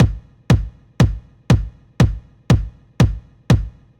Kick house loop 120bpm
kick, loop